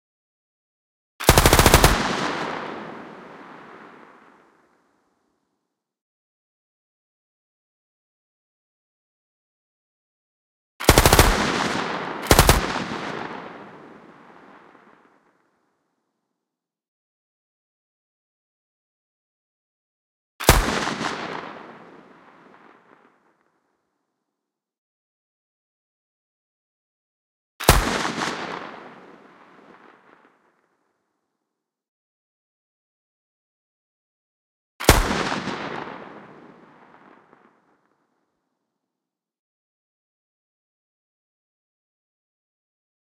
Made in ableton live. Version 2 Light machine gun sound with environment reverb. Processed.